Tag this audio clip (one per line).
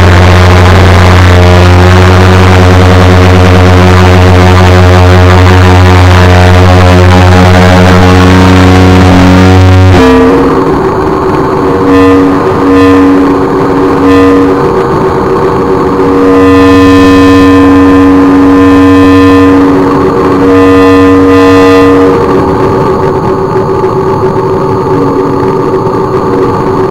noise
stretch
time